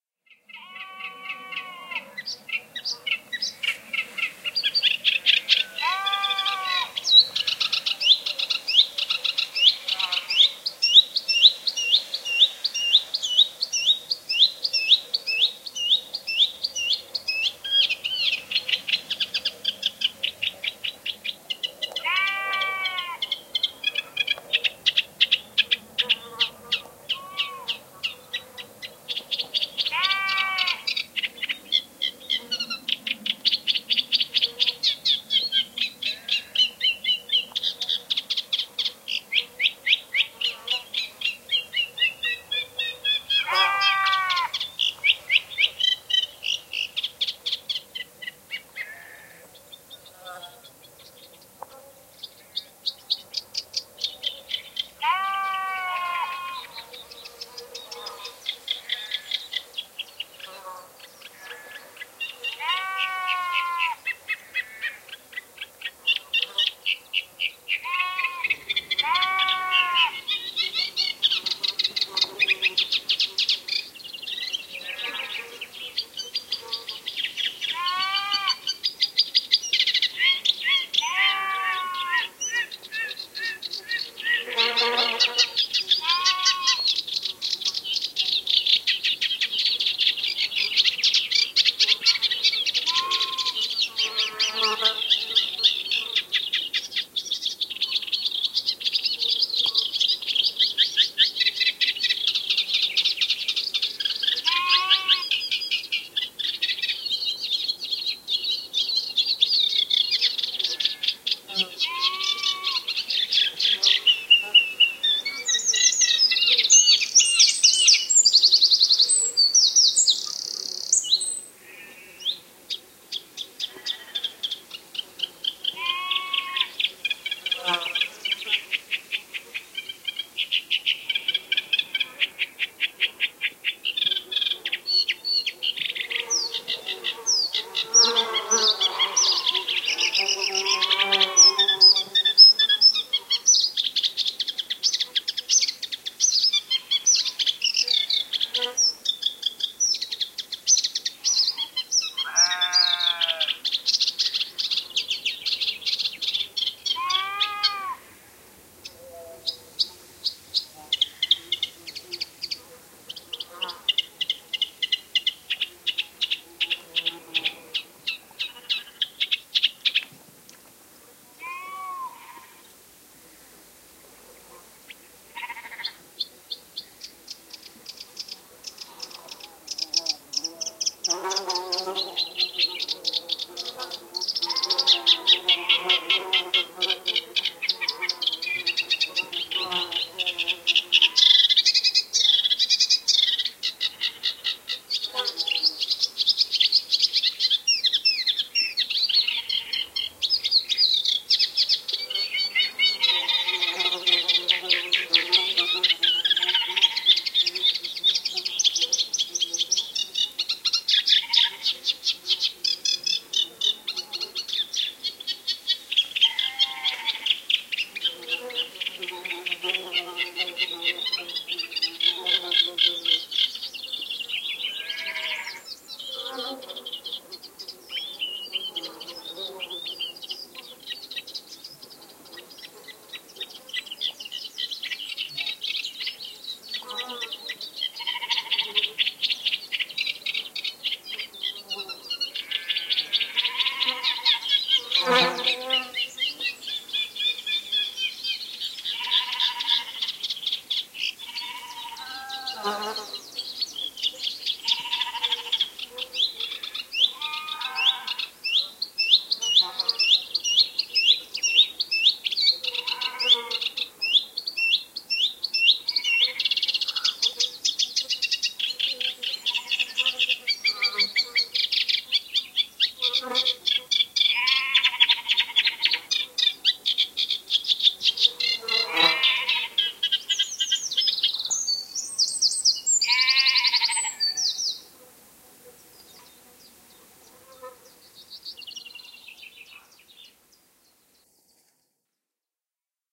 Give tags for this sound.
stereo birds sheep xy bees reeds bird acrocephalus-scirpaceus field-recording

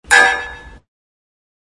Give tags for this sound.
effects kick ti

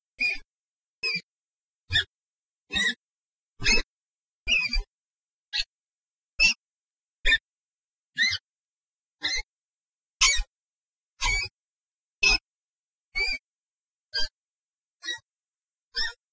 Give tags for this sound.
protest rat squeaking

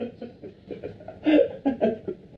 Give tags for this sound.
vocal laughter laughing

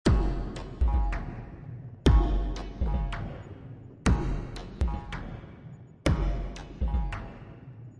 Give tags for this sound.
Rhythm Ableton Beat Reaktor5

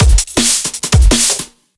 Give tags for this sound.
162bpm DnB Drum-and-Bass loop loops

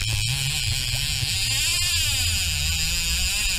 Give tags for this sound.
clicking field-recording fly pulling reel running turning

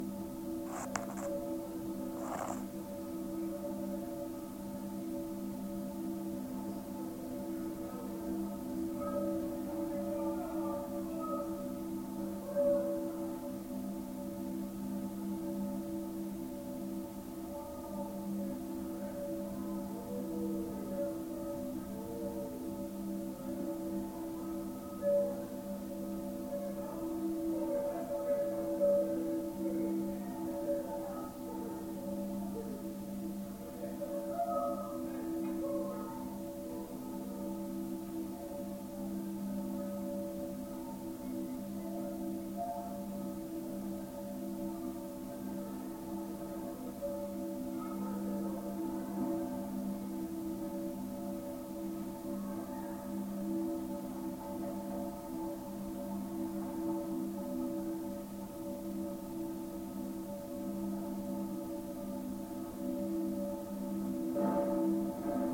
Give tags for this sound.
ambient ceramic contact contact-mic contact-microphone Dango DYN-E-SET field-recording Jun-Kaneko microphone Schertler Sony-PCM-D50 urban wikiGong

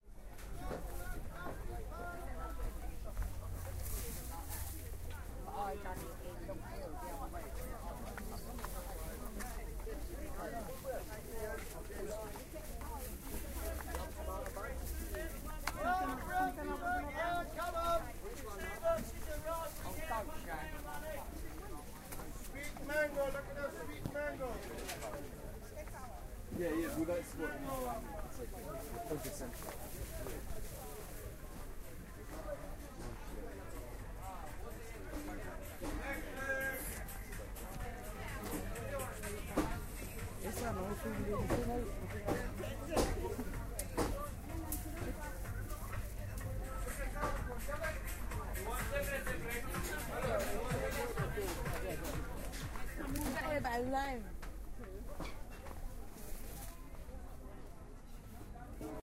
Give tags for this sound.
London Market dalston hackney ridley traders

